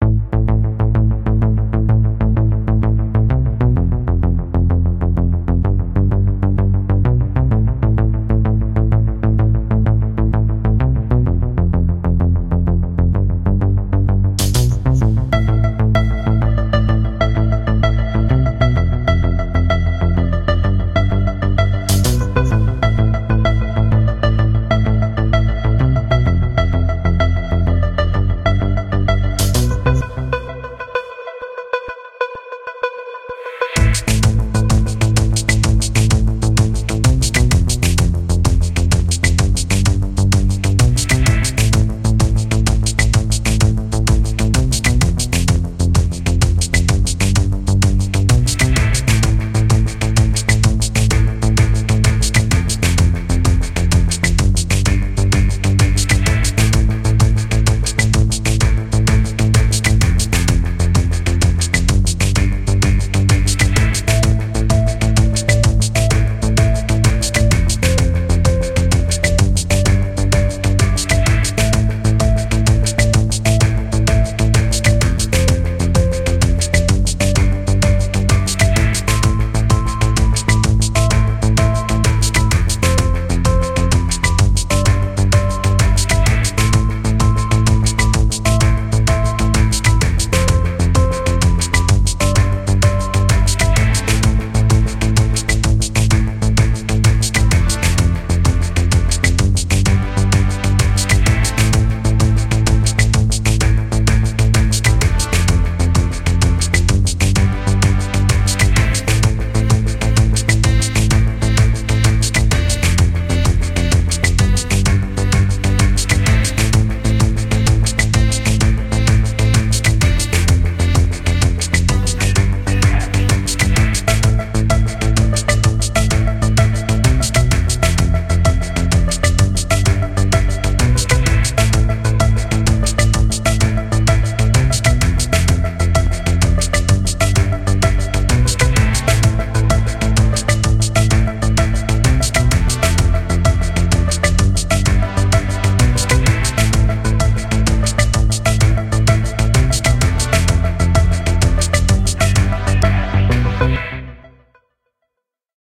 A Free Hip Hop Instrumental For Any Use...